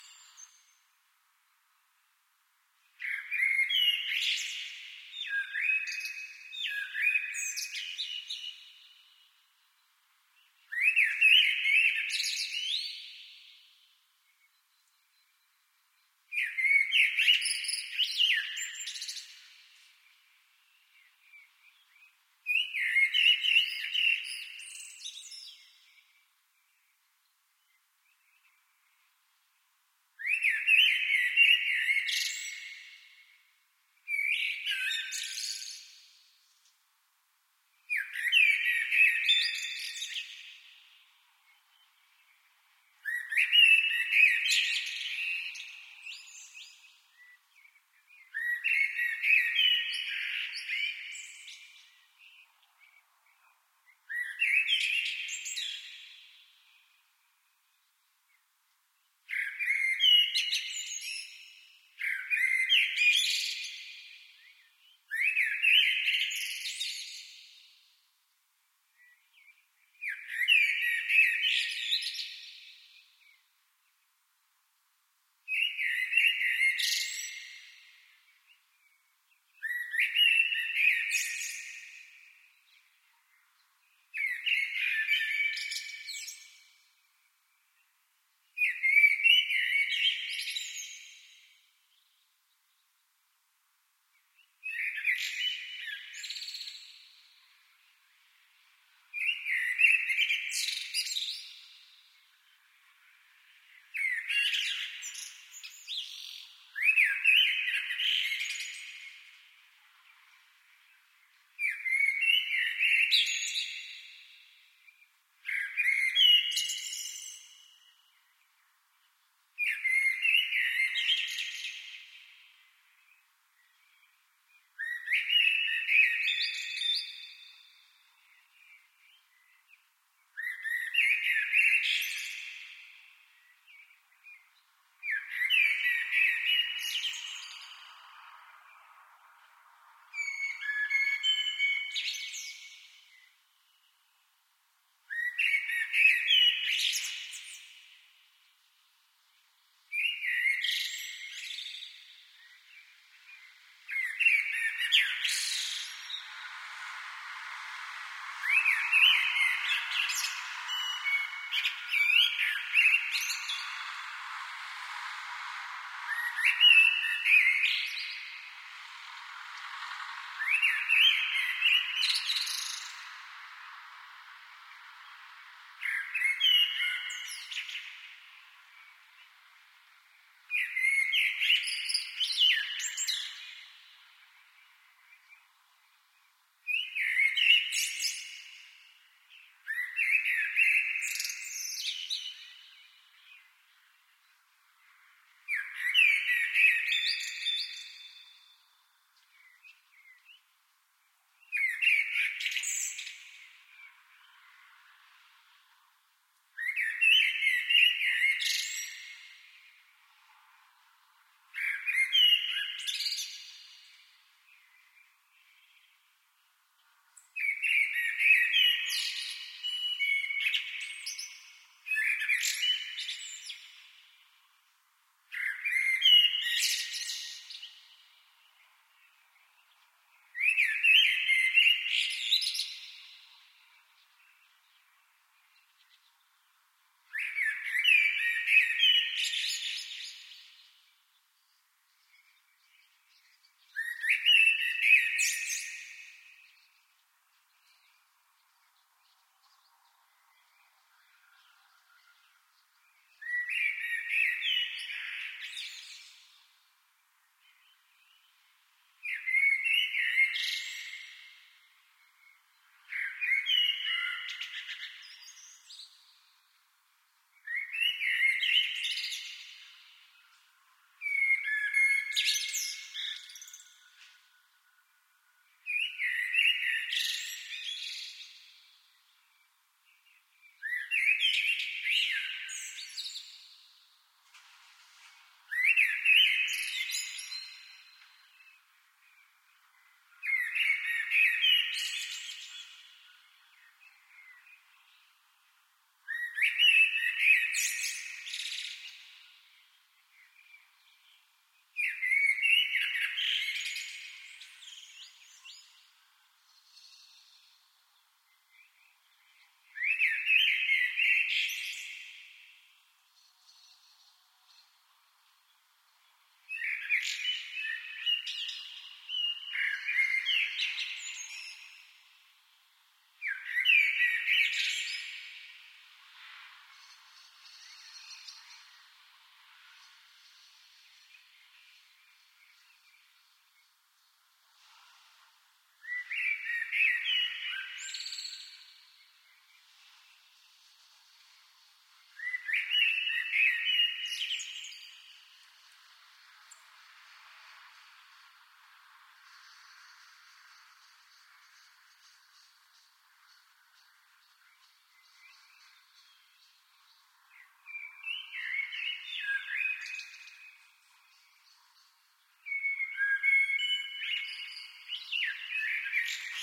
337hp ORTF KRAKOW bird with echo from concrete at 4:50 am early April
Very seletive recording of a birdsong early in the morning on the begining of April.
The city ambience was heavily processed, so when the car appears it sounds quirky. It is left there on purpose, as I guess everyone is going to cut only the part that one needs.
Equipment: Sony PCMD100